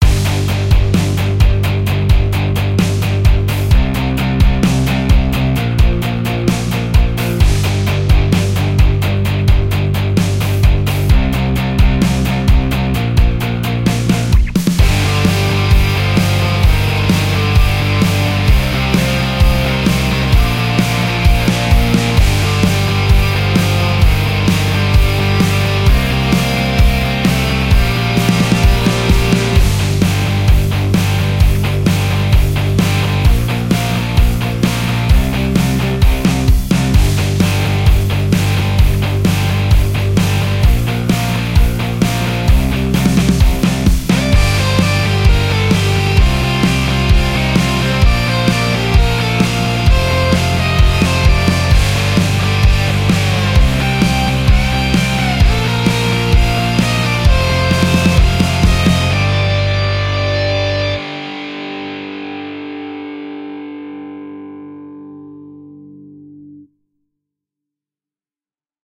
Rock Music
Genre: Rock
I'm using FL Studio on this one with some free VST and didn't expect to be awesome lol.